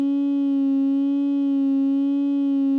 The vowel “I" ordered within a standard scale of one octave starting with root.